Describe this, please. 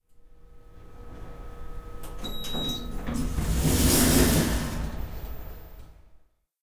An elevator opening its doors. Recorded with Zoom H4 and edited with Audacity.
field-recording, machine